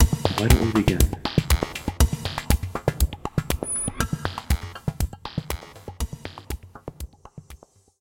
First time here, making sounds and such. Decided to make a "techno-ey" type sound with a spoken word
All this was for academic purposes
Electro, Techno